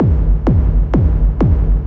Propellerheads Reason
rv7000
3 or 4 channels, one default kick, others with reverb or other fx.